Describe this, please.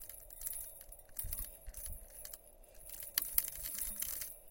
Rain on tin roof
Effects, Foley, OWI, Sound